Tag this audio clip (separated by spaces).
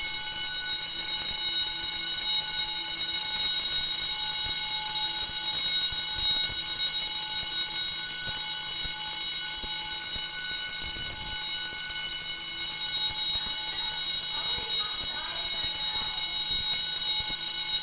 alarm bell bells clanging Fire-Alarm fire-bell ring ringing